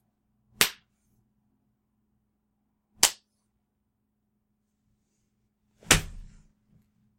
Skin-on-skin slap -- three slaps of different velocities.